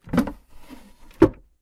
The lid of an ice-maker opening.
Recorded with a Zoom H1 Handy Recorder.